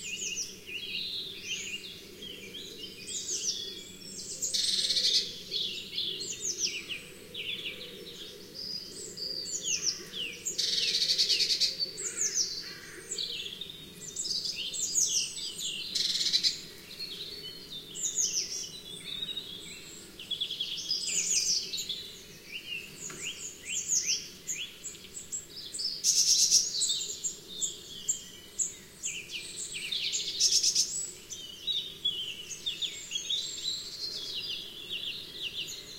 woodland birdies
Rendered completely useless due to the BA Dallas to Heathrow flight constantly in the background. If you were on that flight, I hope the seatbelt sign was on and you were busting for the loo. Grrrr!
birdsong, field-recording, ambience